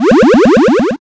An 8 bit evolution/level up sound